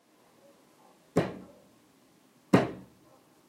hit table
Hitting table with fist, moderate noise
moderate, ambient, noise, wooden, hitting-table